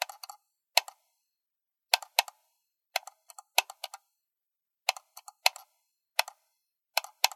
es-mouseclicks
click, clicking, computer, keyboard, laptop, mouse, typing